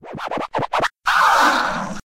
incoming scratch 2
scratch
**USE MY SAMPLES FREELY BUT
fade, scratch